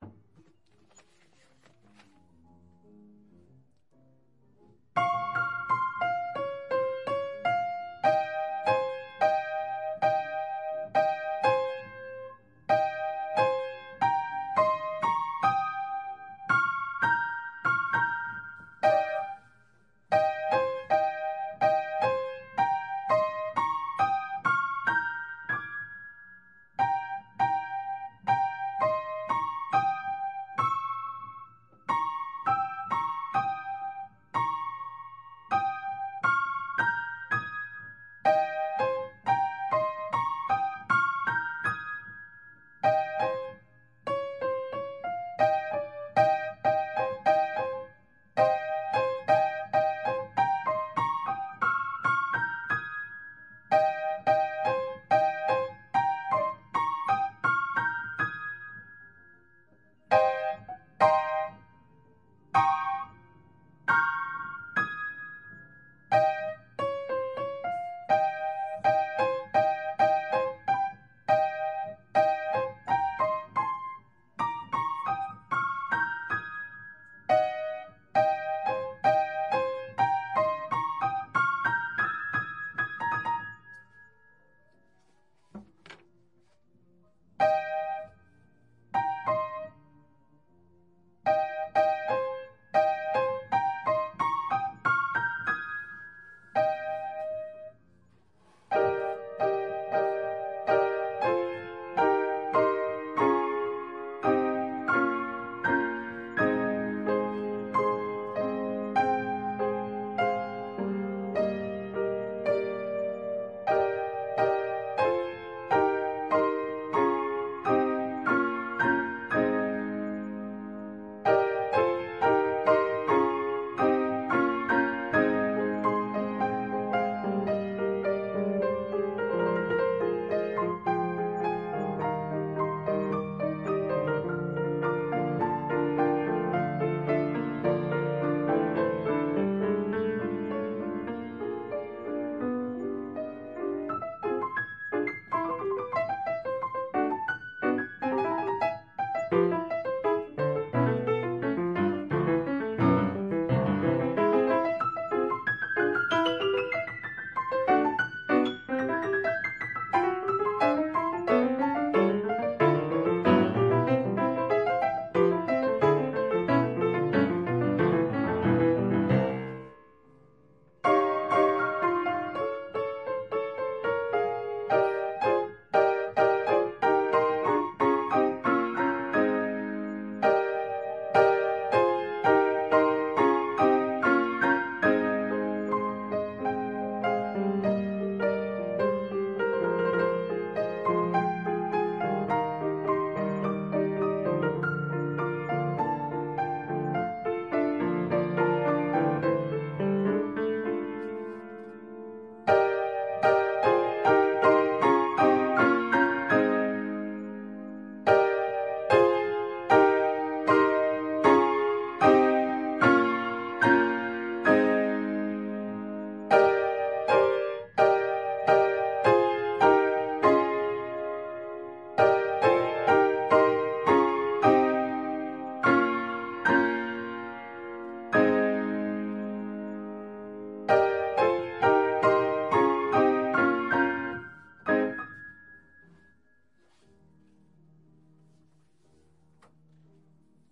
Practice Files from one day of Piano Practice (140502)
Logging, Piano, Practice